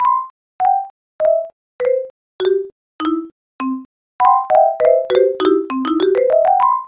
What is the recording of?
Made use of GAMEDRIX' marimba pack.